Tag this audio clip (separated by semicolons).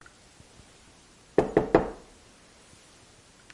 bang; banging; door; hit; hitting; impact; impacting; Knock; knocking; wood; wooden